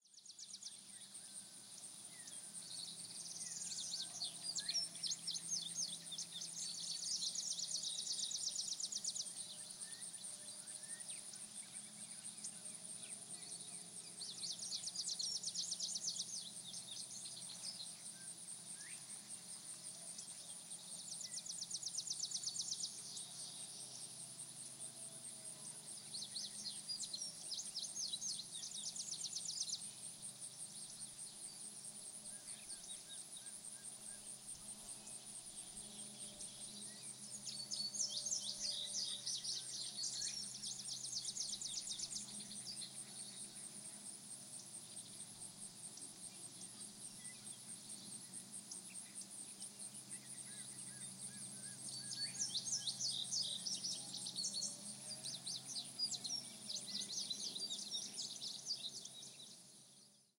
A summer field near a big lake in July, at dusk using Zoom H4N recorder with built-in stereo mics. You can hear a quick fly buzz at 33 seconds in. You will also hear a boat in the distance towards the end. Great to enjoy with good headphones on.